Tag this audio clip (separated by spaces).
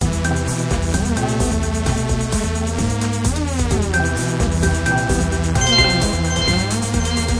game loop music sound